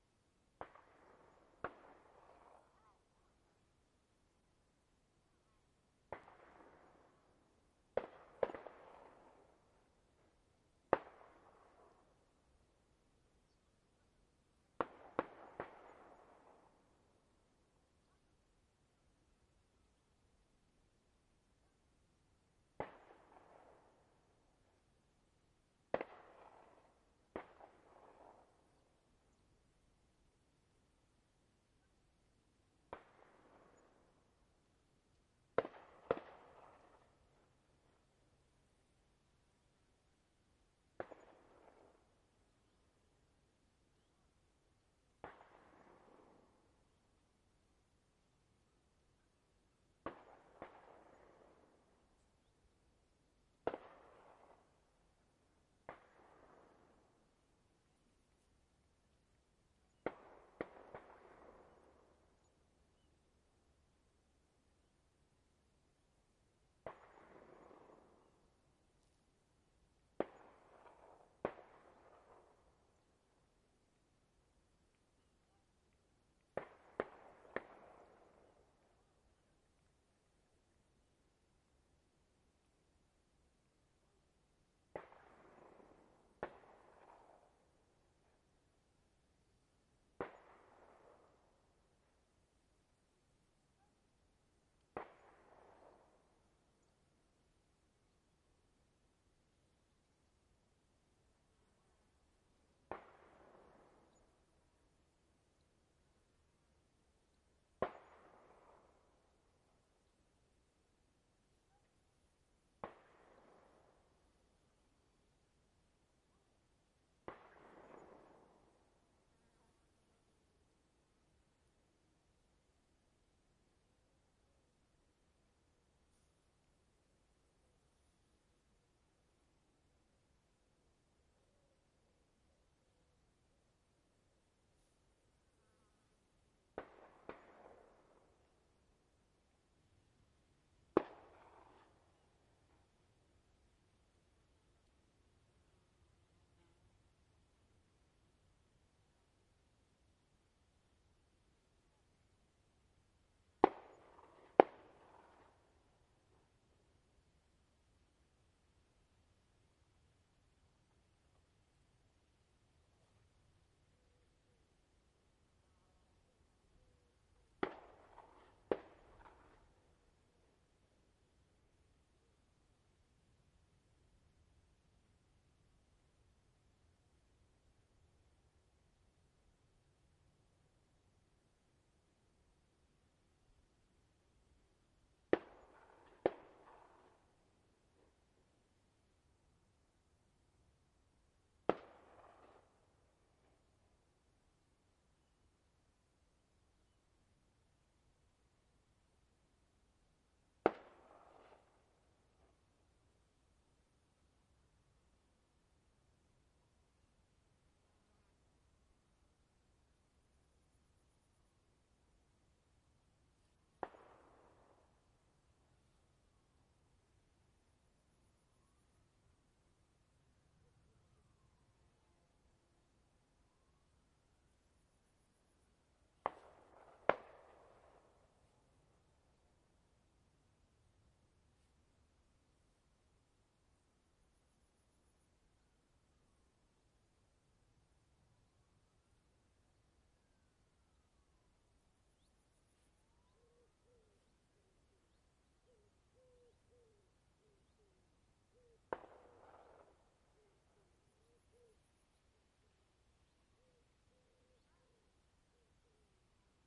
Army Training - Distant Gunfire
Field recording of distant army training gunfire with echo.
Recorded at Hyby Fælled, Fredericia, Denmark - part nature reserve, part army training ground.